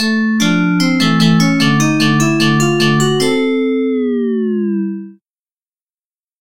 Sound of win in a game. For game development.